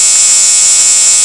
Shaving razer static sound